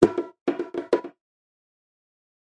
JV bongo loops for ya 2!
Some natural room ambiance miking, some Lo-fi bongos, dynamic or condenser mics, all for your enjoyment and working pleasure.